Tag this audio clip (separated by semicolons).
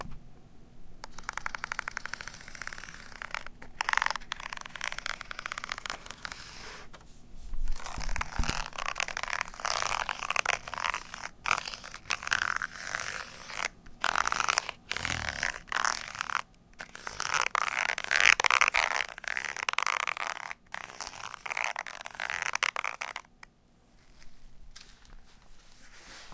floor; foam; mat; scrape; scraped; scraping